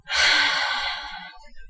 long sigh
so these are all real reactions to certain things i do, i.e, video games, narrations, etc.
i myself need genuinely real vocal reactions. i know i'm not the only one, either, so i post mine as well so others may use them.
interestingly, i've gotten one person who took the time to uh... tell me how they... disapproved of my voice clips, so i just wanted to say that if you wanna use them, do, if not, don't, that's why they are there. i enjoy animating, and others do too, wanting to use others' voice clips. i also had a couple people make techno remixes of a lot of my sounds (thank you by the way, they're awesome).
it's not that i care about the... interesting way the one person expressed their opinion, it's just to let some similar acting people know that i post these for a reason. *shrug* but whatever floats your zeppelin, i honestly don't care. ANYWAY, for those who DO use them, thank you. :P